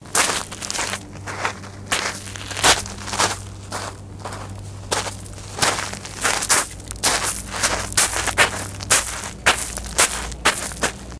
Footsteps on stones & pebbles
A sound effect of a footsteps on stones and pebbles
footstep, pebbles, step, foot, walk